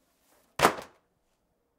Dropping Books
Dropping, Floor